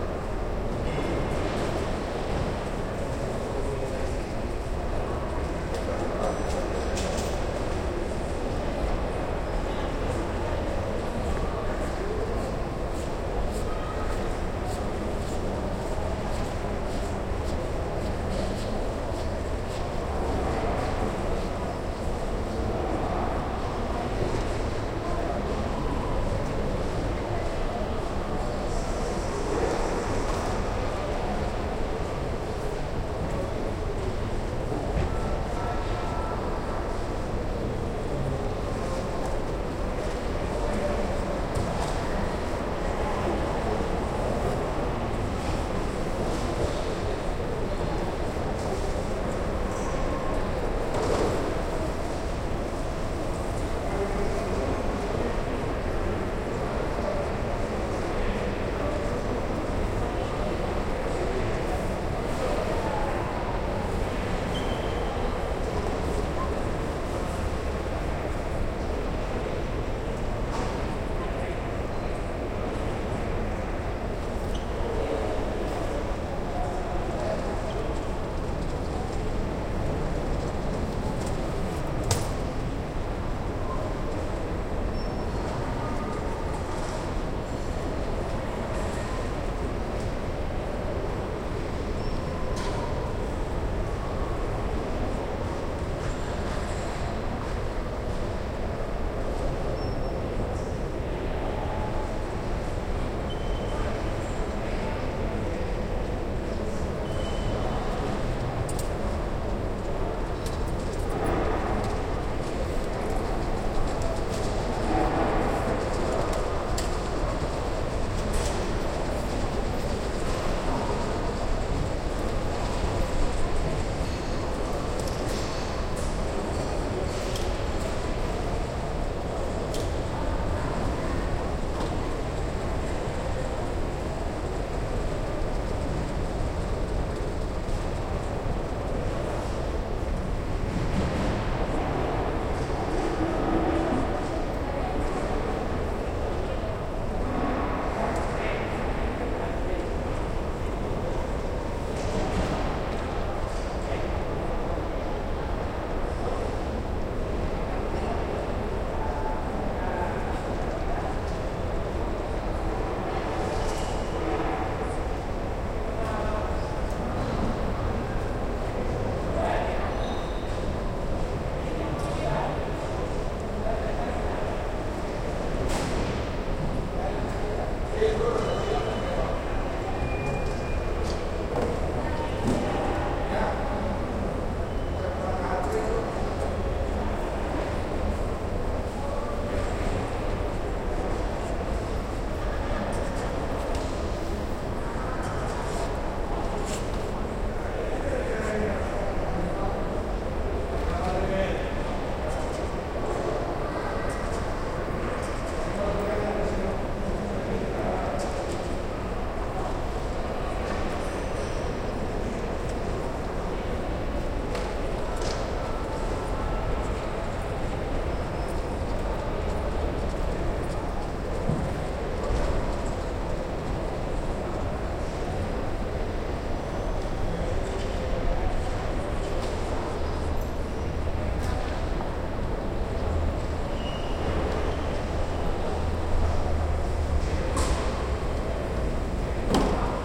Airport hall ambience recorded with the Marantz PMD 661 MKII internal stereo mics.
soundscape, terminal, field-recordings, airport, people, aeropuerto, airport-hall